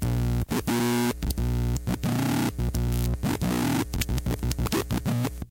A basic glitch rhythm/melody from a circuit bent tape recorder.